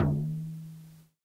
Tape Hand Drum 12
Lo-fi tape samples at your disposal.
tape, mojomills, hand, lo-fi, vintage, collab-2, Jordan-Mills, drum, lofi